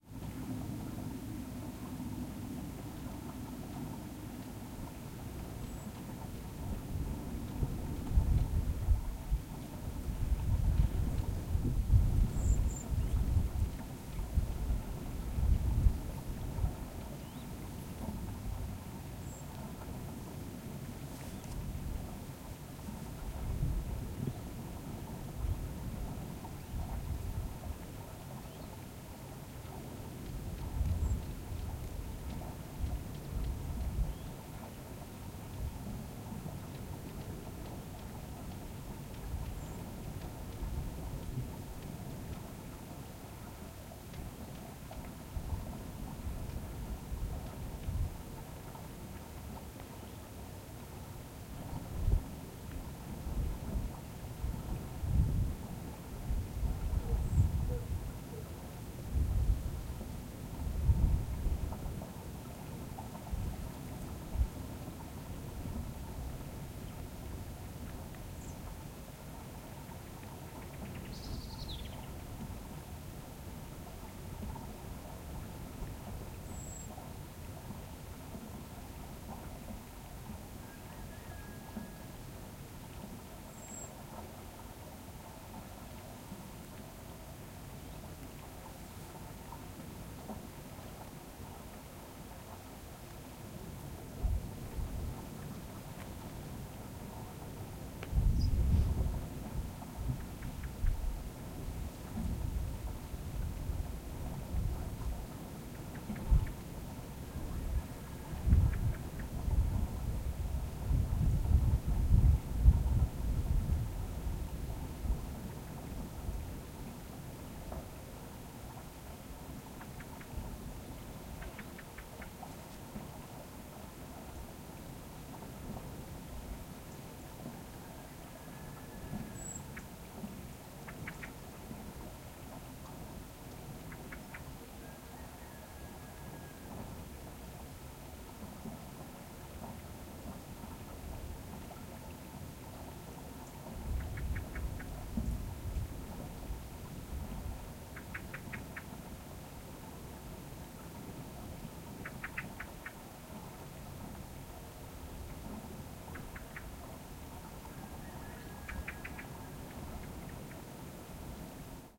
Ambience - morning atmosphere - small stream - moutains - provence - south France - rooster - birds 6 am - wind gusts
Calm morning atmosphere recorded in the mountains in south of France.
Some wind, birds and roosters.
A small water stream nearby.
morning, ambiance, mountain, soundscape, field-recording, ambience, atmosphere, ambient, stream, water, wind, atmos, background-sound